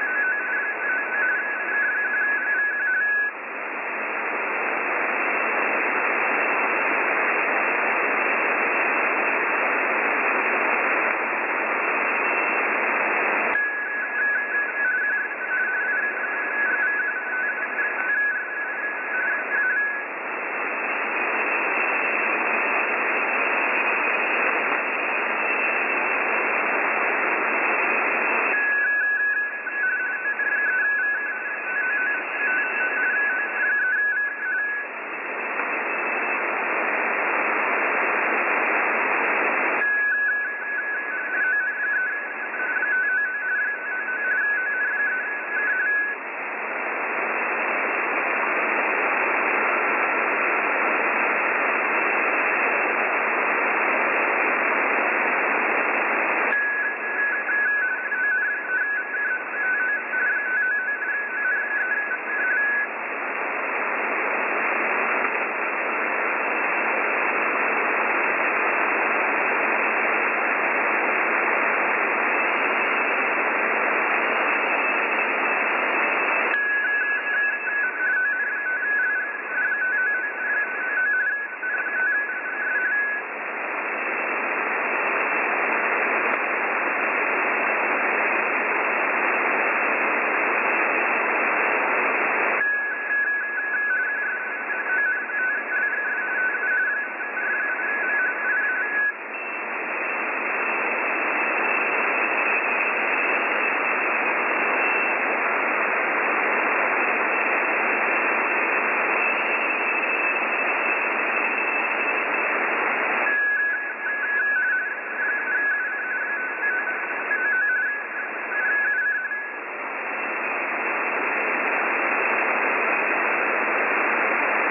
Ham radio morse code broadcasts on shortwave radio. The file name tells you the band I recorded it in. Picked up and recorded with Twente university's online radio receiver.
am, amateur, amateur-radio, beep, code, ham, ham-radio, morse, morse-code, radio, shortwave